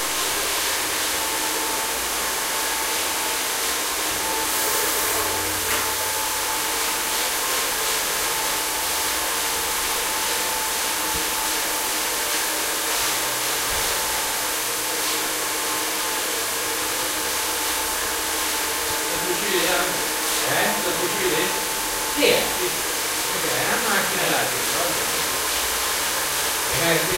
HAIR DRYER
Recorded in a Italian Barber shop by Zoom H2N
Loud, Dryer, Bathroom, Sound, Hair, shop, barber